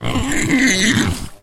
Piglet Squeal 01
A piglet angrily squeals at its mother.
angry, swine, shrill, pigs, scream, piglet, pig, yell